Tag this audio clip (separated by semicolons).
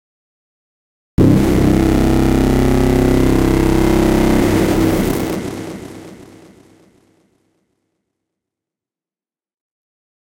odd; noise; strange; ebm; indus; impact